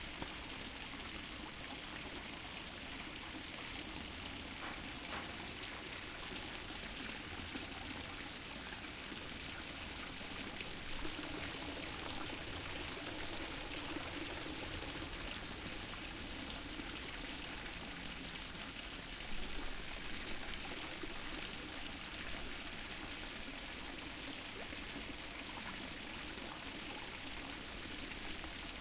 Small fountain in Lisbon.
fountain, stream, water